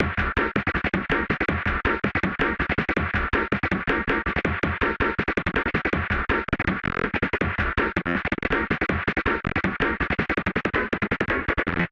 processed acidized loop
dnb, idm, processed